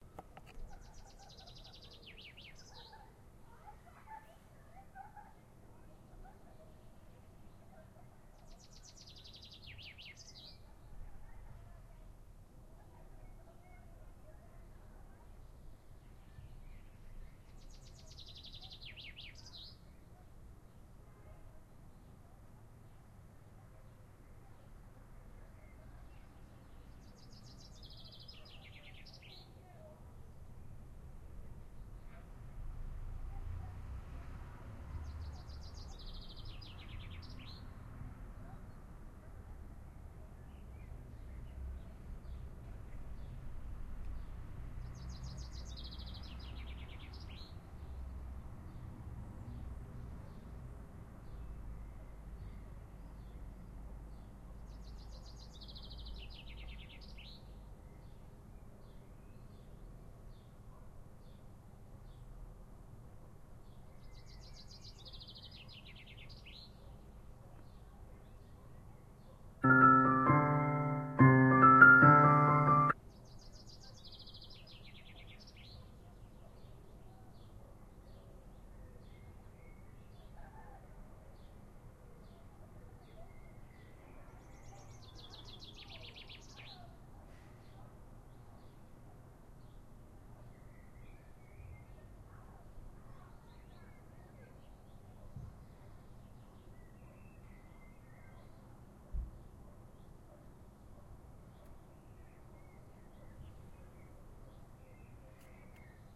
Soft neighbourhood sounds; children playing/laughing, dogs barking, birds chirping, winds blowing, etc.